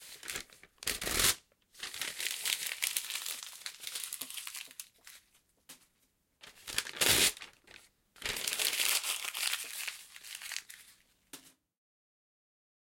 002 - Rip Page From Notebook & Toss in Trash
Field-Recording, Rustle, Crinkle, Paper, Rip